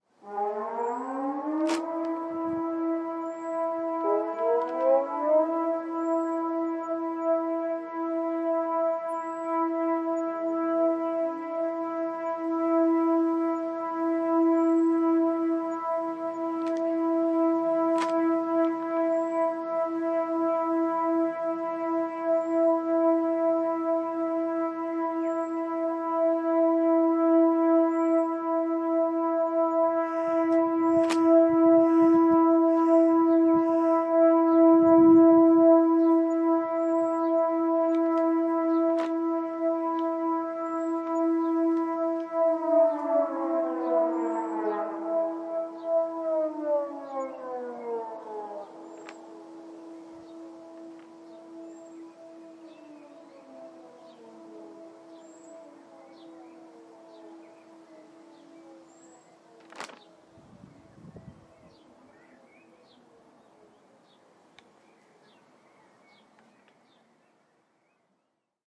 Air raid warning sirens 2 (07 may 2014)
Every year, at midday on the 1st Wednesday in May, 1,078 air raid sirens across Denmark are tested. I originally misidentified these as warning sirens from the local oil refinery. There are 3 signals:
12:00: "Go inside"
12:04: "Go inside"
12:08: "Danger is passed"
siren, warning, emergency, field-recording, test